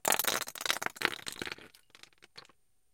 One day in the Grand Canyon I found a deep crack in a cliff so I put my binaural mics down in it then dropped some small rocks into the crack. Each one is somewhat different based on the size of the rock and how far down it went.
click, scrape, crack, rock